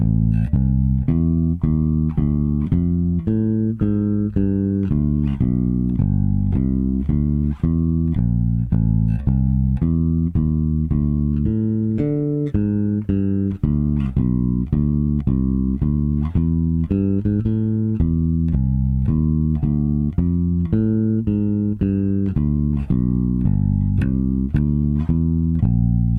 Bass - Walking Feel
12 measure, 110bpm walking bass line with 6-2-5-1 progression in C Major
bass, electric, jazz, walking